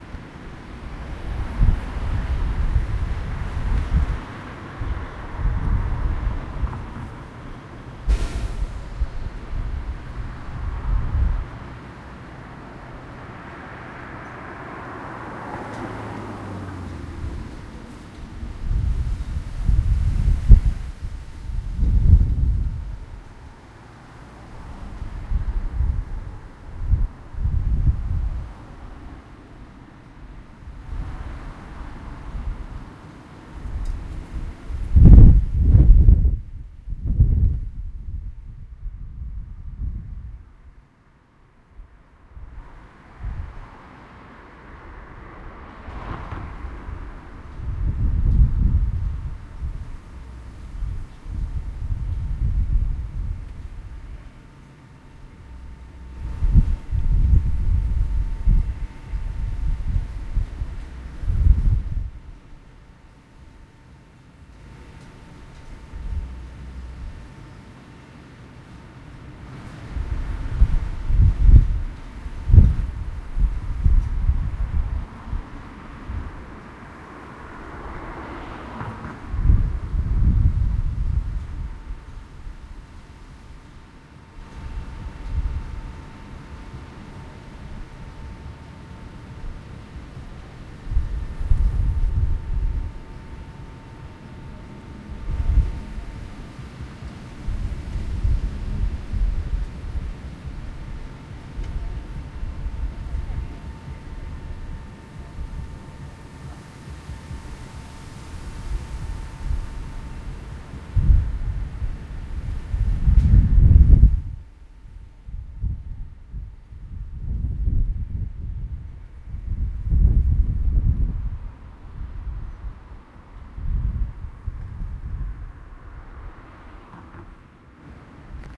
Bus Station - 2
Cannock Soundscape. Recorded on 23/05/2020 at 6pm.